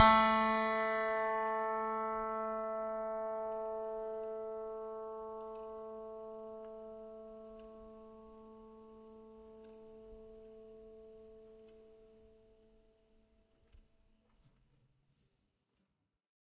a multisample pack of piano strings played with a finger